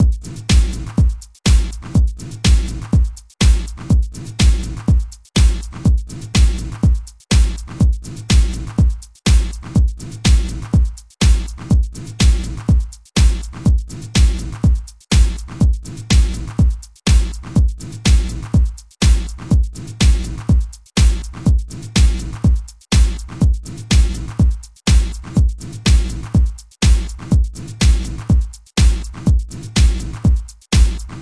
Trance Beat & Synth
A trance beat and synth at 123 BPM.
bpm, snickerdoodle, synth, trance, dub, 123, electronic, beat, house